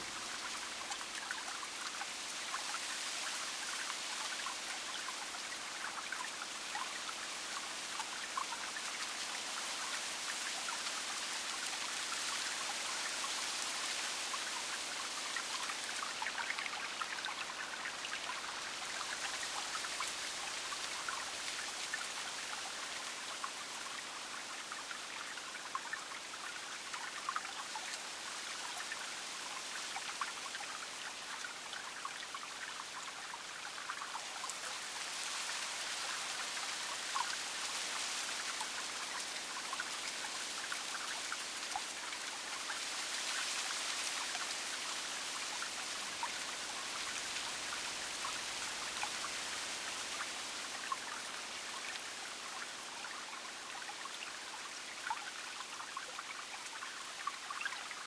EXT AMB Day Windy Trees Near Stream Patagonia AZ
There is a light consistent breeze blowing through trees and rustling leaves on the ground. There is a small stream flowing over rocks nearby. There is no animal or human sound.
Close-up, Ranch, Natural-sounds, Daytime, Water, Ambient-sound, Nature-sounds, Calm, Stream, Nature, Travel, Quiet, Ambiance, Smooth, Exterior, Sound-effect, Ambient, Tranquil, Breeze, Soothing, Wind, Relaxed, Cool, Soft, Leaves, Peaceful, Trees